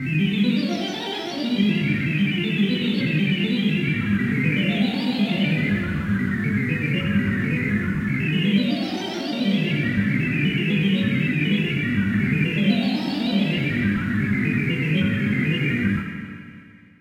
this sound is a combination between a synth and a organ playing a crazy scale